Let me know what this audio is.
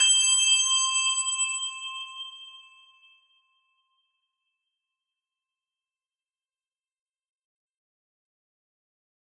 Christmas Bell 4
Xmas, bells, Christmas, Bell